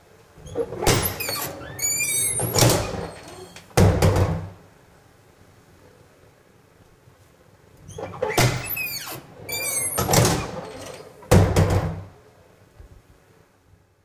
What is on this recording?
Public washroom push door open and close x2, squeaky hinges on door, hits door frame hard when closes. Exhaust fan in background